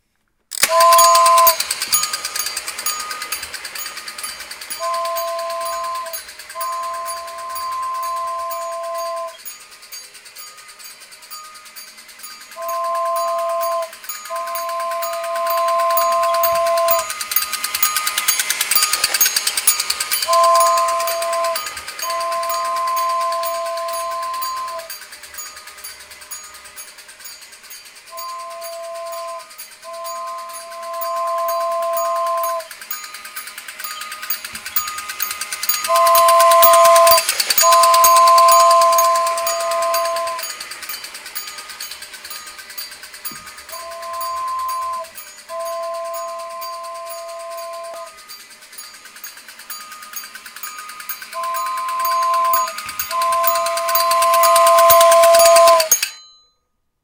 "Talking Silver Rail Express" toy train circa 1991 recorded with Blue Snowflake USB microphone and MacBook using Audacity. Length is about 1 minute.